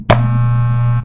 clippers on
Wahl hair clippers turning on and buzzing.
appliances, buzz, buzzing, click, clipper, clippers, hum, machine, trimmer